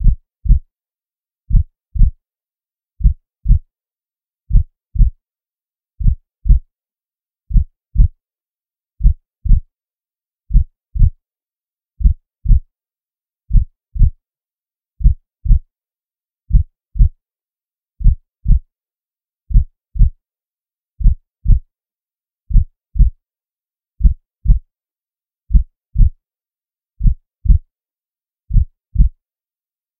heartbeat-40bpm-limited
A synthesised heartbeat created using MATLAB. Limited using Ableton Live's in-built limiter with 7 dB of gain.
heart, heart-beat, heartbeat, body, human, synthesised